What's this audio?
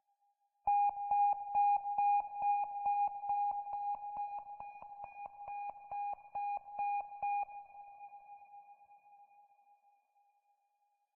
A synthesised alarm sound with some reverb and panning. Part of my Strange and Sci-fi 2 pack which aims to provide sounds for use as backgrounds to music, film, animation, or even games.
beep, bleep, electronic, synth